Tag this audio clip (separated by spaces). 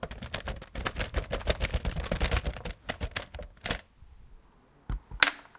hits variable objects random